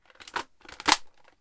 Recorded from a steyr aug airsoft gun. Reload sound in stereo.